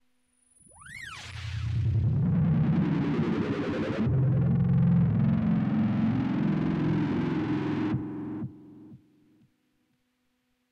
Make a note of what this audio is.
Sample taken from Volca FM->Guitar Amp.